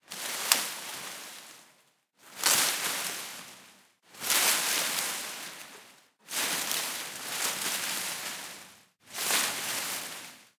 Leave reed rustle
Wind rustling leave / reed
reed, nature